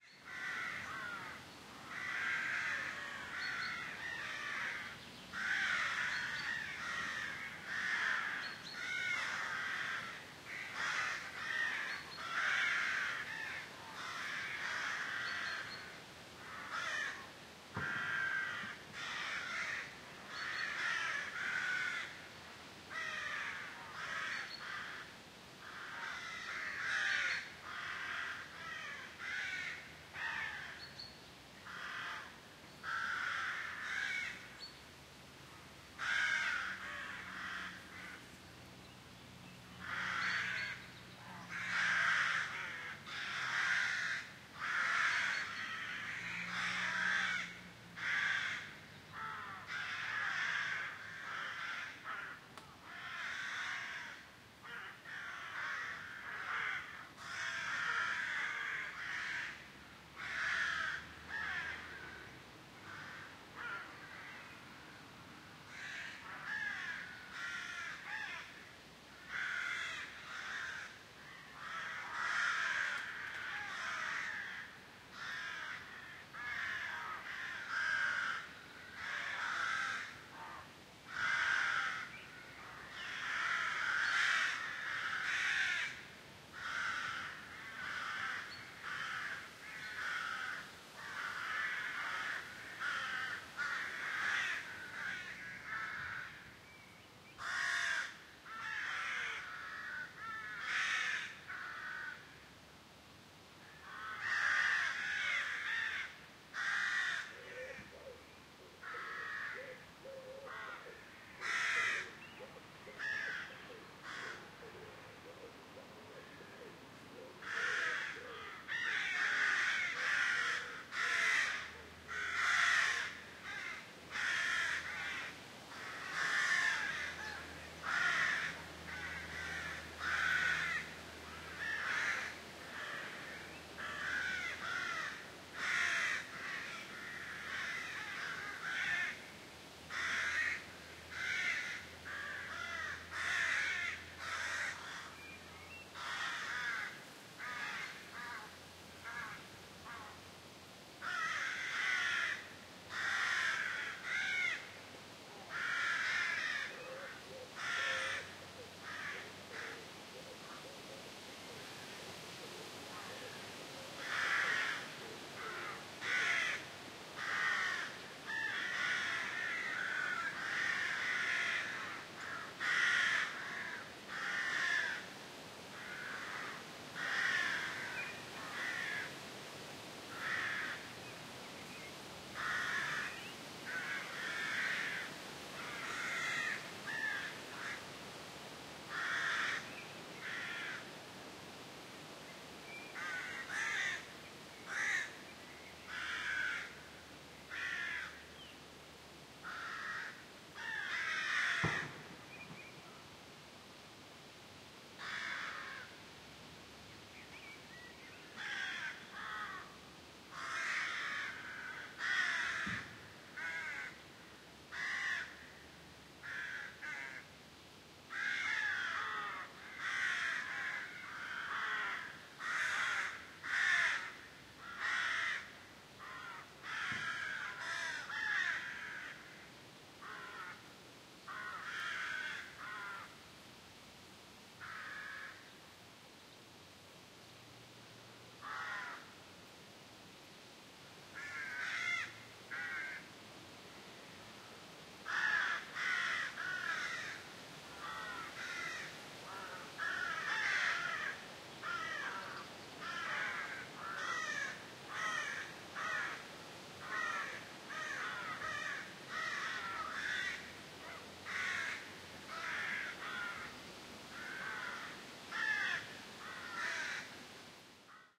Full of crows flying and cawing over their nests in the trees, lot of wind.
Sony PCM D100
Recorded the 18 of april 2020, 11 AM.